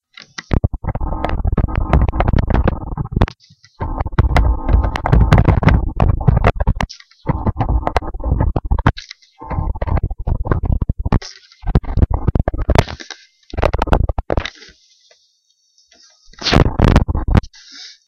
Here are a few more sounds created while I block my mic while I record during another day at school. I create these sounds by having my hand partly over the mic while I record. This one I recorded and for some weird reason it was a lot longer than usual, but I hope you enjoy it anyways. Here is a link to a little bit short one(I guess it is medium length):